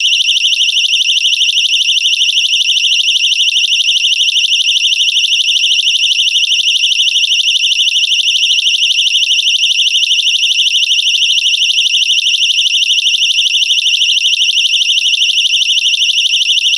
Personal Alarm
A mono recording of an attack alarm issued to bank staff, it is designed to be worn around the neck and triggered by pressing a button. Played loud it actually hurts the ears.
alarm, high-frequency, loop, mono, siren